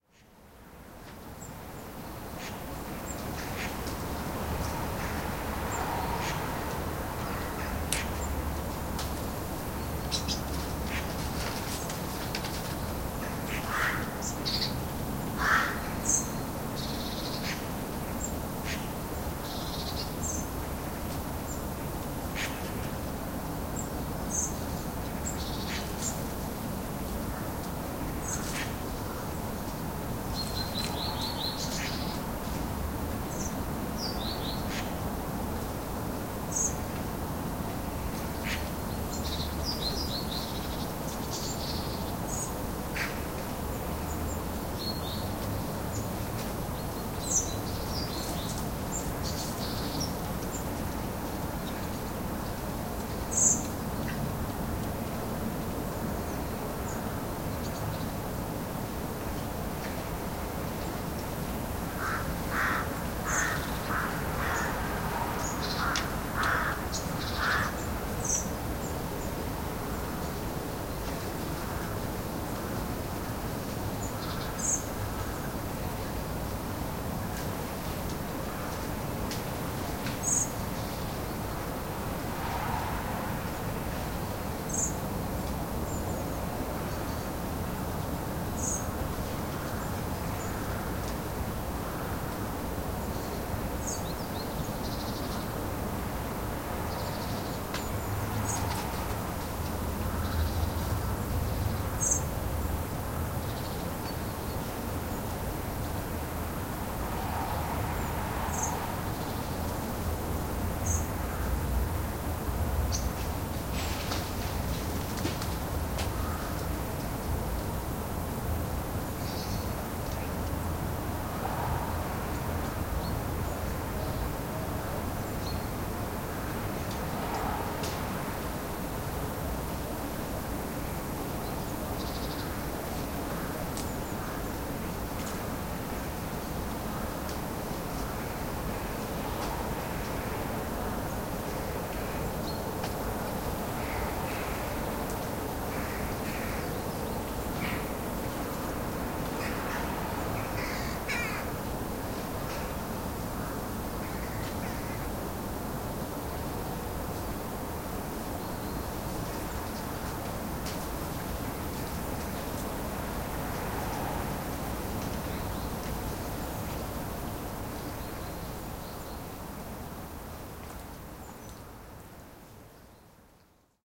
Recording of birds and ambient sounds on the edge of a city in autumn.